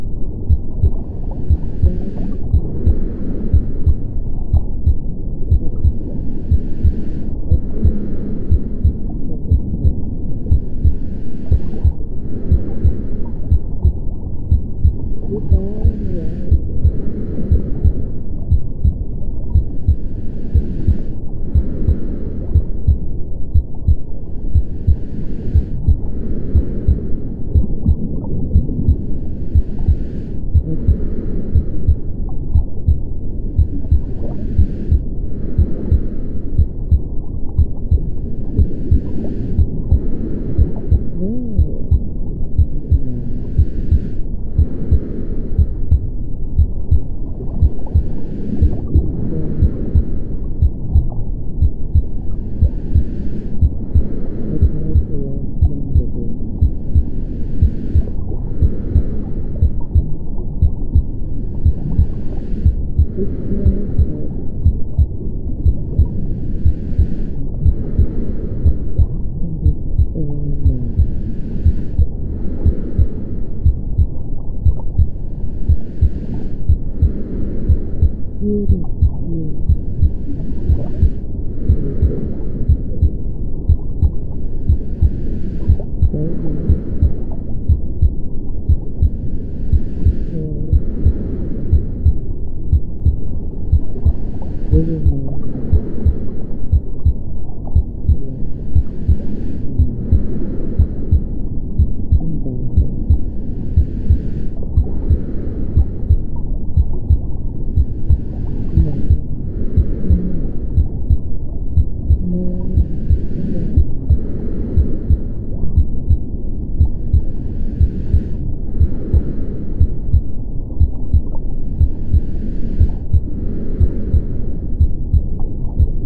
I wanted to create a better sounding womb environment, and so I created this sound in audacity by combining a recording of my breathing along with a heart beat at 60 bpm, underwater sounds to simulate the womb environment and to top it off there's the muffled sound of a female voice reading a story to her unborn child.
asmr, noise, womb, white, ambiance